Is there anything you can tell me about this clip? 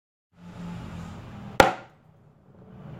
el sonido de un tarro de Raid gopleado con una mesa
the sound of Raid jar been hit against a table
solo, simple, Casual